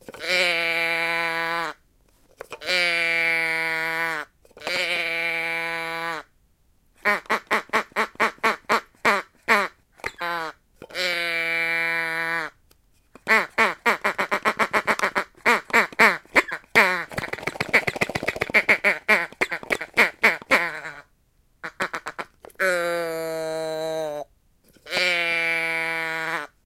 a mooing box toy, turned around several times
animal, baa, box, cow, moo, mooing, sheep, toy